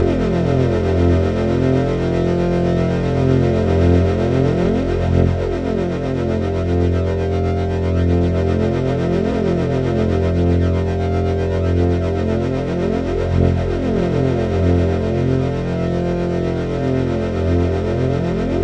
Stereo tron bike engine
flange, light-cycle, sci-fi, stereo, tron, vehicle